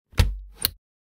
Window Close
Shutting my window c3000
Lock, Window, Click, Close, Shut